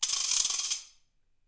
wooden noiser 2
very popular vibra slap, H4 stereo rec